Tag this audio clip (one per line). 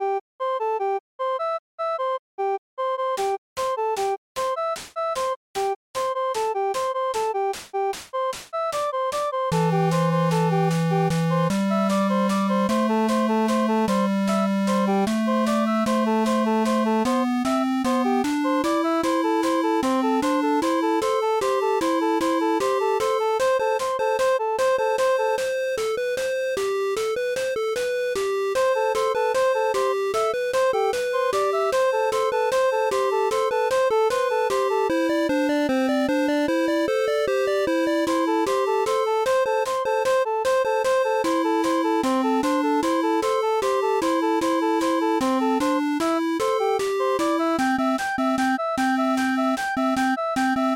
Pixel
Loop
Music